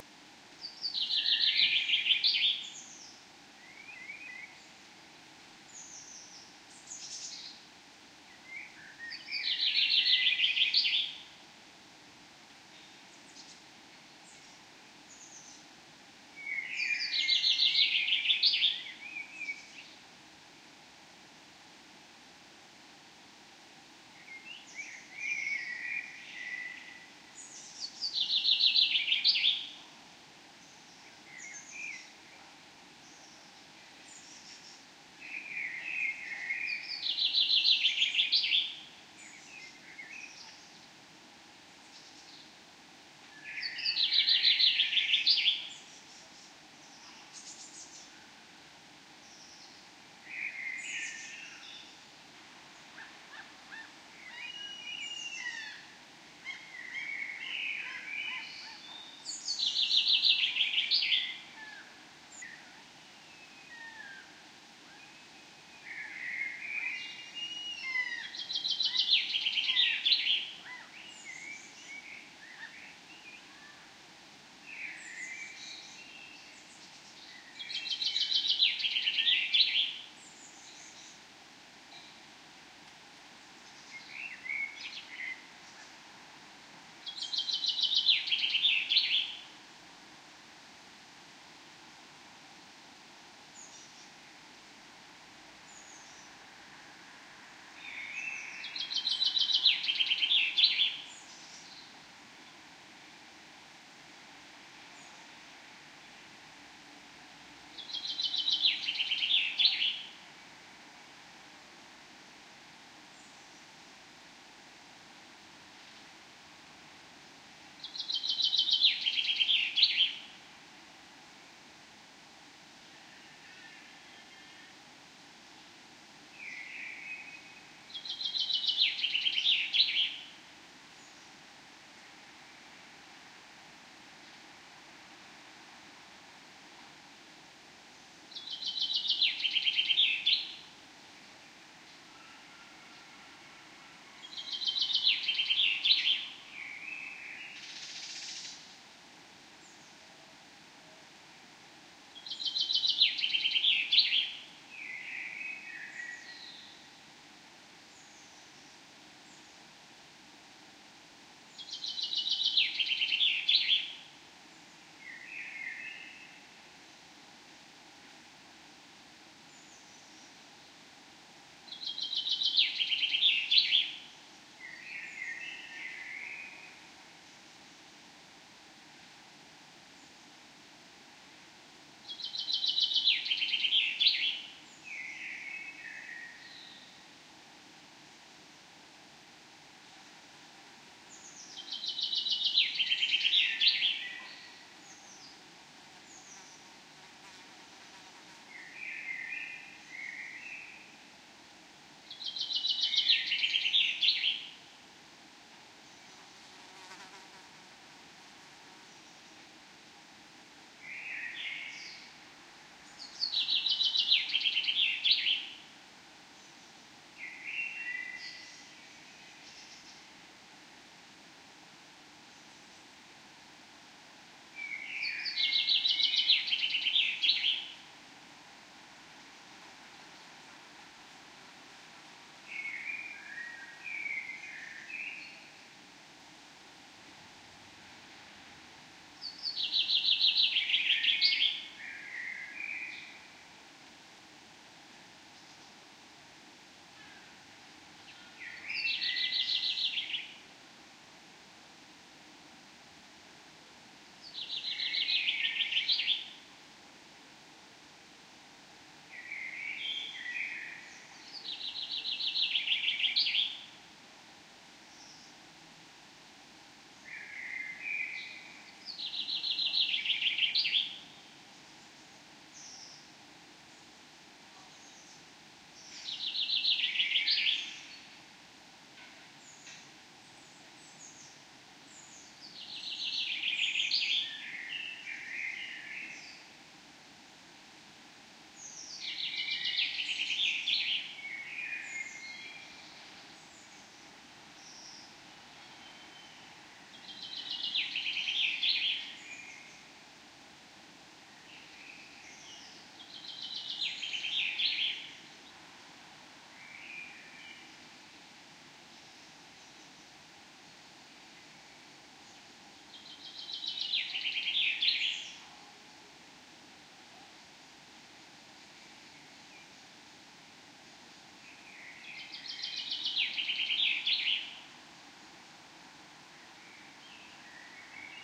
birds in park filtered
various birds in a city park. hipass, noise filtered, normalized
birds, ambience, park, city